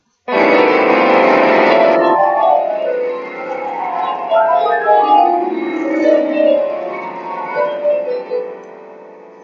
I record myself smashing a piano. No need to worry about the piano, it is alright. This sound could be used as a jumpscare or some random person smashing a piano.